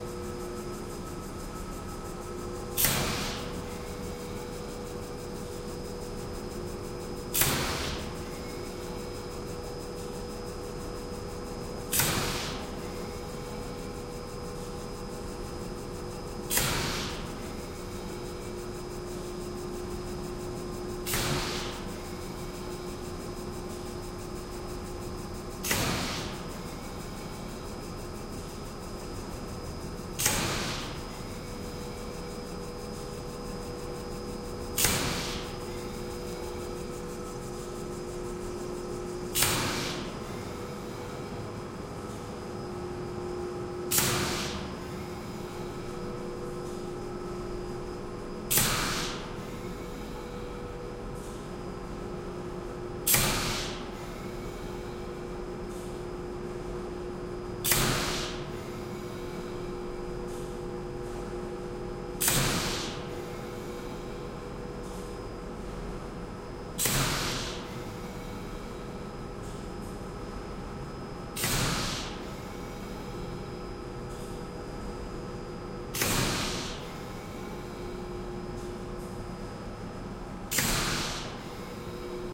Pomp CBR
This is a mix of two layer of the same environment in the CBR concrete factory. One is a distant stereo ambiance recording, the other is a close-up of the same machine, in mono. The mono was done with a Sanken CS3e on a Tascam DR-100, the stereo with the built in Omni mics of the DR-100. Mixed in reaper. Recording was done on the 16th of december 2014.